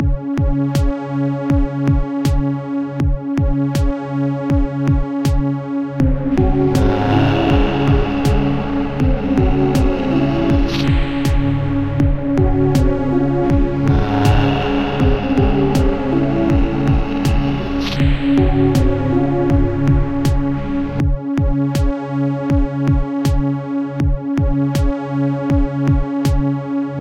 a light horror sample (a zombie lost his way). All sound in this sample made with Ableton
80bmp, dark, groove, slow, voices